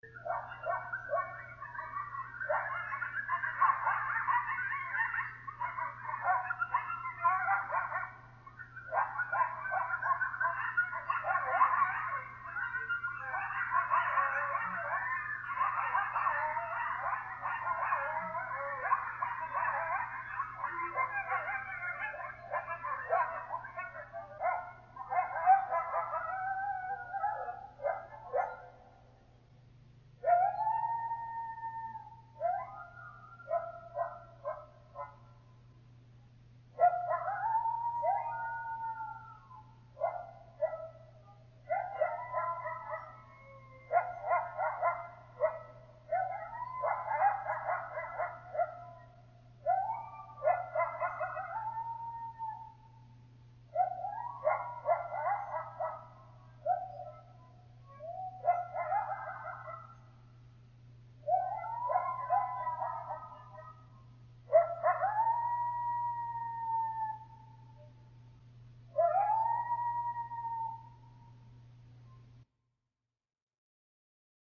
I recorded these in the back of our place. Couldn't see them at night back in the woods but they were going to town and it was just about a full moon. I used a Yamaha Pocketrak and edited with Sony Sound Forge. Thanks. :O)